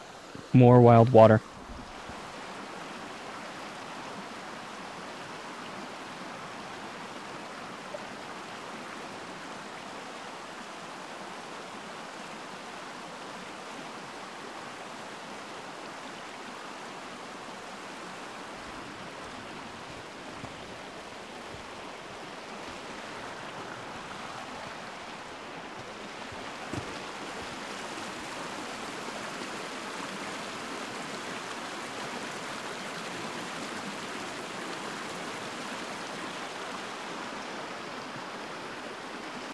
Water sounds, rushing river